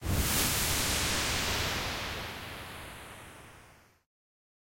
By request. A whoosh. 2 in a series of 7 - more slow and steadyI took a steady filtered noise waveform (about 15 seconds long), then added a chorus effect (Chorus size 2, Dry and Chorus output - max. Feedback 0%, Delay .1 ms, .1Hz modulation rate, 100% modulation depth).That created a sound, not unlike waves hitting the seashore.I selected a few parts of it and added some various percussive envelopes... punched up the bass and did some other minor tweaks on each.Soundforge 8.
effect, electronic, fm, soundeffect, synth, whoosh